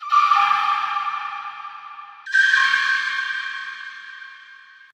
oboe sequence 4
oboe processed sample remix